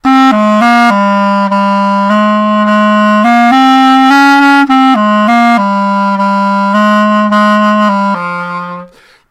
From a recording I'm demoing at the moment.Clarinet part 1 (two clarinet parts) at 103 bpm. Part of a set.Recorded in Live with Snowball Mic.